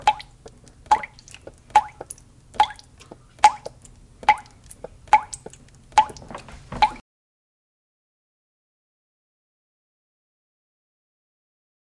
Shower Water Running Drip Toilet